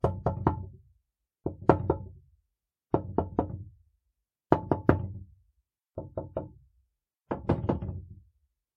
2022-01-14-rec-inside-knock-glass-door
I left my phone inside a wooden cupboard and tried to call it back outside by knocking on a glass door. Did it listen? It’s a secret.
Recorded with Redmi Note 5 phone, denoised and filtered bogus sub bass in Audacity.
insistence, knocking, cupboard, wood, knock, door, glass